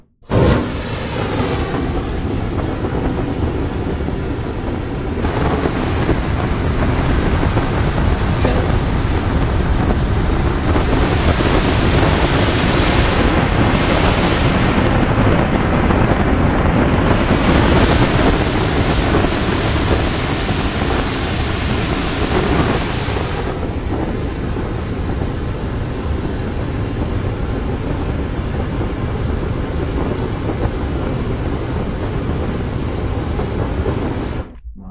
constant spray fire 2
Field-recording of fire using spray-can with natural catacomb reverb. If you use it - send me a link :)